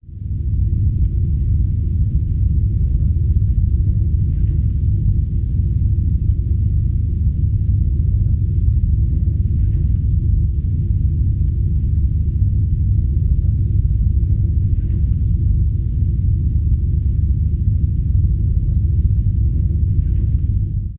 horror room ambiance, hum and noise
recorded by: AKG C4000 + steinberg ur22
Plugin: Octaver, Saturn, room reverb, 3 bond EQ, 30 bond EQ, warm tube compressor